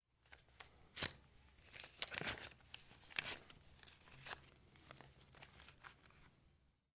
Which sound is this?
Counting Money (Bills)
Counting money bills (Pesos and Dollars)
(Recorded at studio with AT4033a)